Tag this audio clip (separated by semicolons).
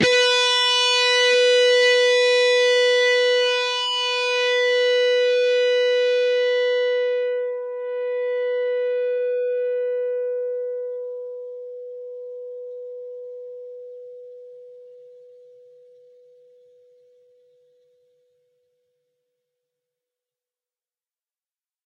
single; single-notes; distorted; distortion; guitar; strings